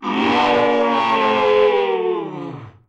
Death scream in mask
Man dies in gas mask
yell, screaming, death, pain, hurt, agony, scream, painful